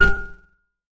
STAB 017 mastered 16 bit
An electronic percussive stab. A percussive sound like an electronic
marimba of xylophone. Created with Metaphysical Function from Native
Instruments. Further edited using Cubase SX and mastered using Wavelab.